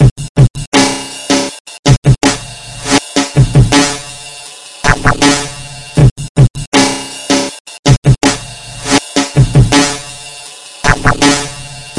Glitch beat 160 BPM
A simple drum loop, but then glitched
I made this in FL studio 11 with standard hip-hop samples (With standard i mean the samples you can just find in your FL studio folder)
To glitch it i used Dblue_Glitch
Bass, Beat, Drum, Loop, Dubstep, Jungle, Drums, Sample, Breakbeat, Drumstep, Kick, Glitch, DnB, Drum-And-Bass